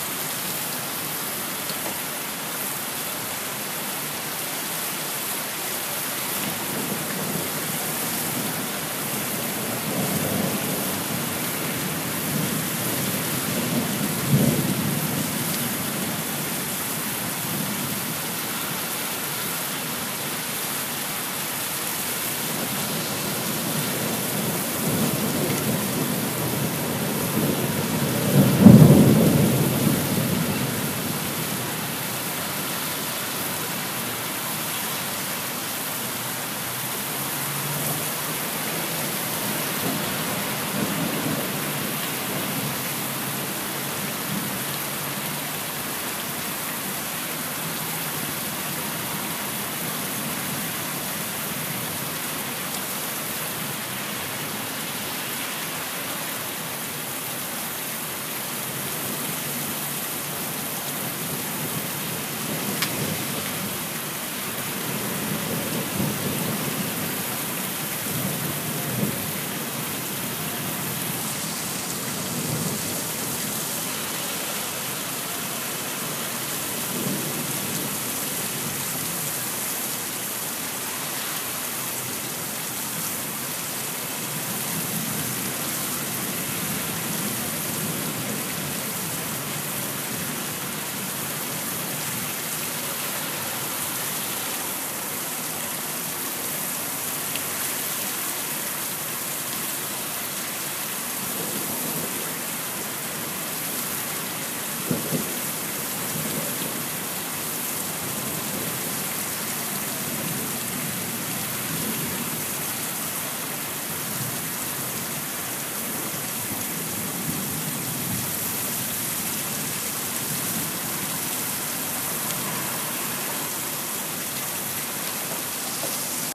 rain with thunder